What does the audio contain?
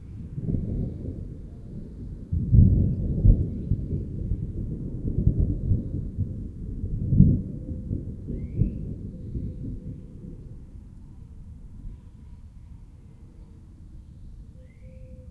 single, distant thunder /trueno aislado lejano